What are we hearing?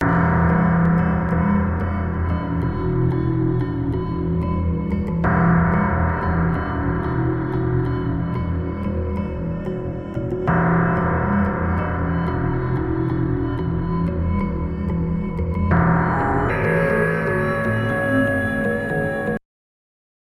Midnight Wolff Bell
Wuff, Nigts, Light, Bells, Strange